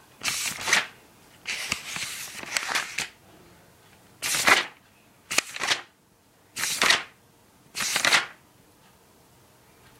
turn the page
use for turn the book page
book, turn, page